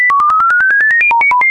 This sample is a generated CCIR code. It doesn't say anything important. Just all the 15 characters used: 0123456789ABCDE so you can cut up and create your own codes.
ccir, code, police